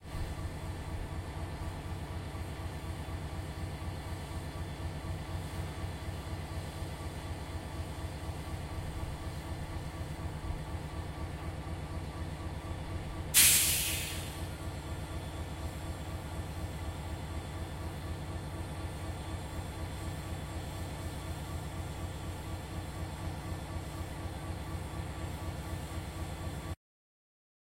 train-engine fade hipass
Gently highpass filtered to remove a little wind noise, and fade in added.
ambience, ambient, engine, field-recording, railway, train